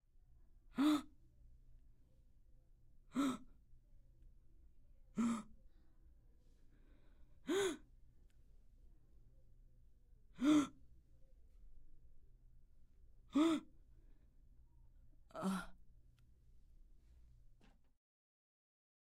39-Murmur When Having A Great Idea
Murmur When Having A Great Idea